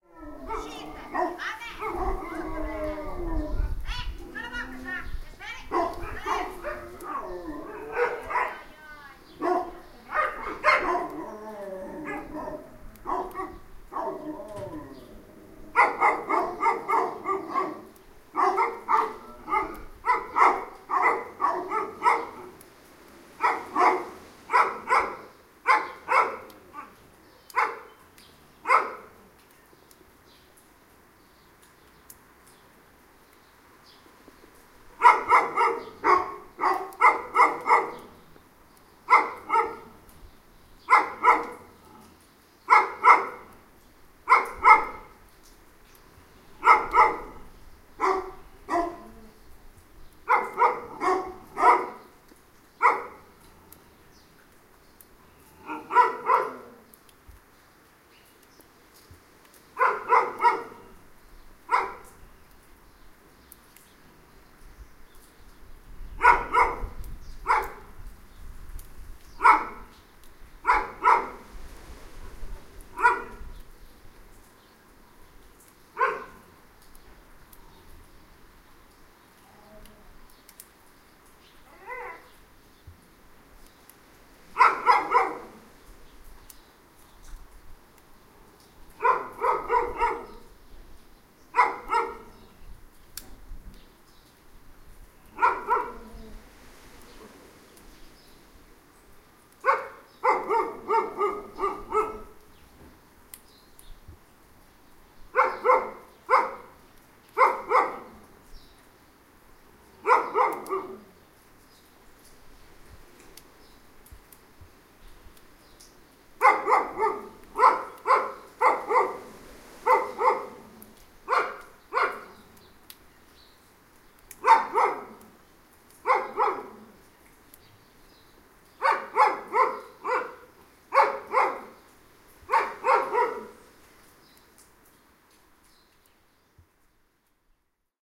[9]castelo branco#rua de ega
Two dogs barking and a woman trying to shut up them. Recorded using Zoom H4N.
voices, dogs, Castelo-Branco, field-recording